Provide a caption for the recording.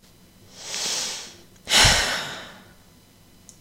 Sigh 2 Femal
A young woman sighing, possibly in frustration, exasperation, boredom, anger, etc.
breath, breathe, female, girl, human, reaction, sigh, speech, vocal, voice, woman